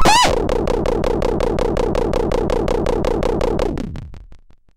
nordy glitch 002
glitch, raw, noise, fm, nord, idm, modulation, modular, beep, digital, boop, click, buzz, wave, synth, pop